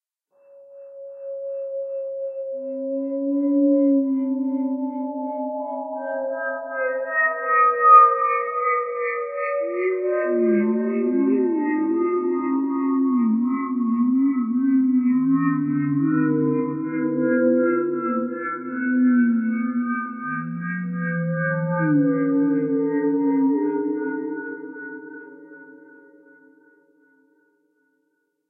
made with vst instruments
ambience, ambient, atmosphere, background, background-sound, dark, deep, drama, drone, film, hollywood, horror, mood, movie, pad, scary, sci-fi, soundscape, space, spooky, suspense, thrill, thriller, trailer